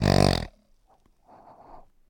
Squeaky pig dog toy single snort snorting grunt squeak oink (49)
One of a series of recordings of a squeaky rubber dog toy pig being squeazed so it grunts. Slow with a low grunt and air inhale
dog, plastic, squark, toy